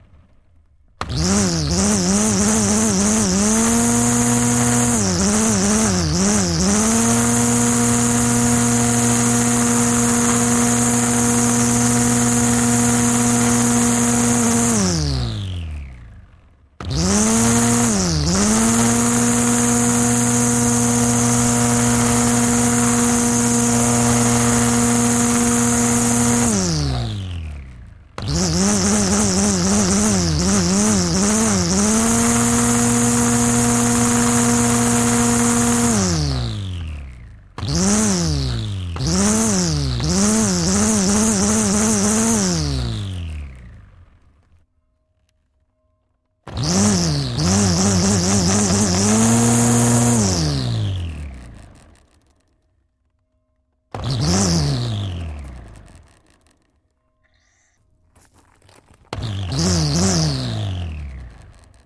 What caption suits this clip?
lawn mower electric engine mowing long cuts multiple use mono 4061
This sound effect was recorded with high quality sound equipment and comes from a sound library called Lawn Mower which is pack of 63 high quality audio files with a total length of 64 minutes. In this library you'll find recordings different lawn mowers, including electric and gas engine ones.
mechanical, mowing, cutting, cuts, electrical, cutter, grass, engine, motor, effect, cut, sound, lawn, mower, electric, trim, trimming